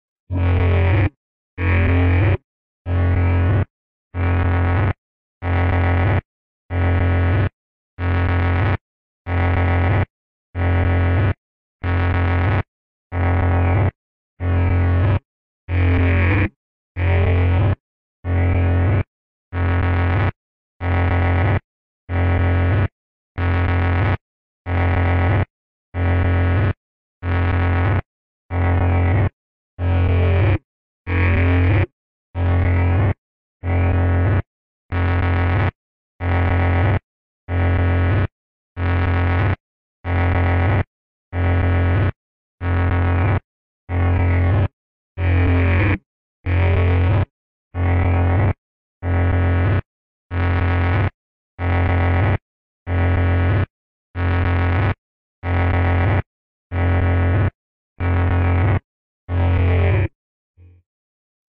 a lo fi alien beacon style drone / effect.